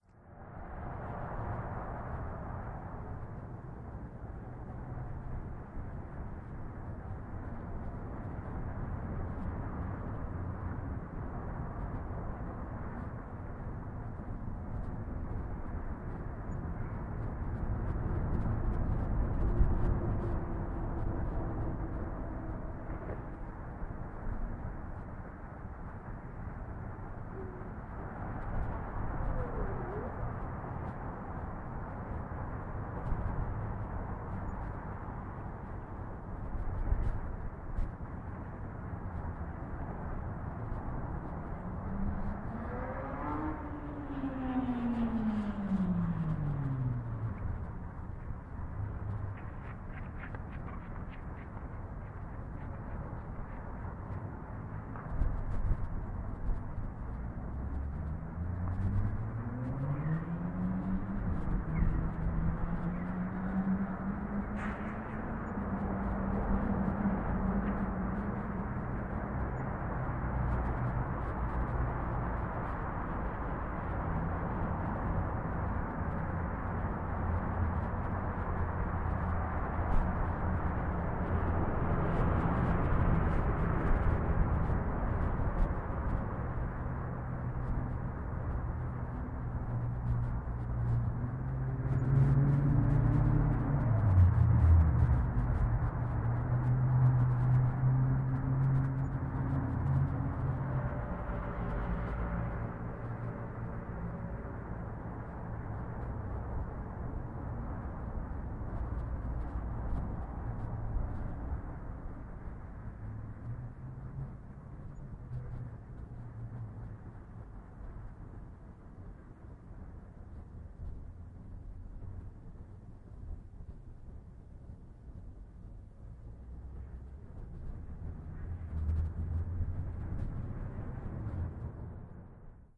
I recorded this sample in hotel parking lot in Ocean City, MD along the Costal Highway at 73st.